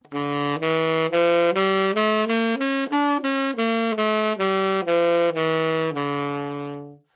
Sax Tenor - D minor - scale-bad-rithm-staccato-minor-harmonic
Part of the Good-sounds dataset of monophonic instrumental sounds.
instrument::sax_tenor
note::D
good-sounds-id::6240
mode::harmonic minor
Intentionally played as an example of scale-bad-rithm-staccato-minor-harmonic
scale, sax, tenor, Dminor, neumann-U87, good-sounds